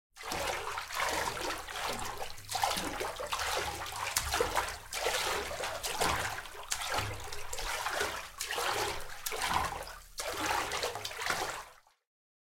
11 Swimming - Slow 02
Slow, Hands, Sport, Swimming, Pansk, CZ, Panska, Czech